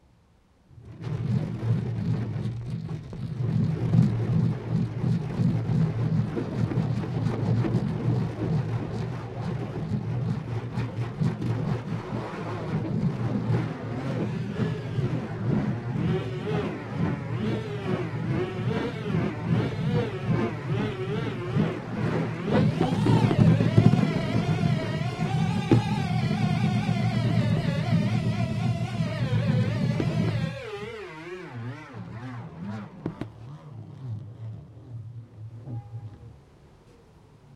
metal balls spin in balloon ST
spinning tiny metal balls in an inflation balloon